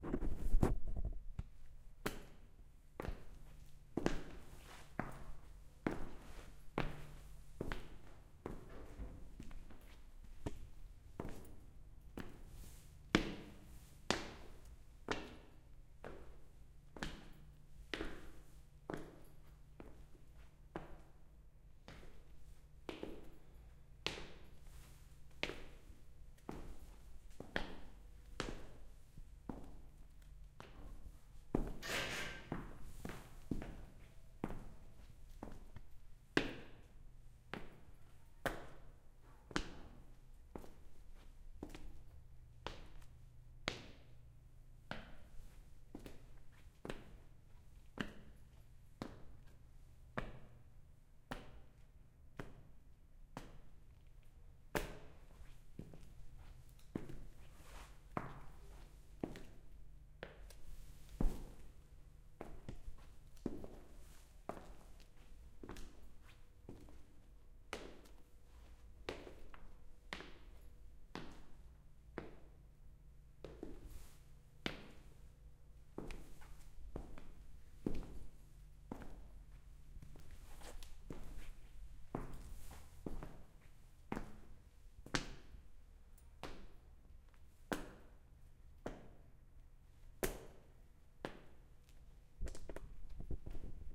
FX Footsteps Echo01
Walking slowly around an echoing hallway.
echo, walking, echoes, fx, walk, footsteps, step, foley